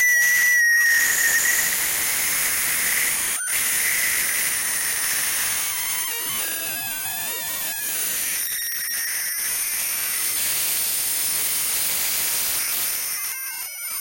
Results from running randomly-generated neural networks (all weights in neuron connections start random and then slowly drift when generating). The reason could be input compression needed for network to actually work. Each sound channel is an output from two separate neurons in the network. Each sample in this pack is generated by a separate network, as they wasn’t saved anywhere after they produce a thing. Global parameters (output compression, neuron count, drift rate etc.) aren’t the same from sample to sample, too.
digital, glitch, harsh, lo-fi, neural-network, noise, random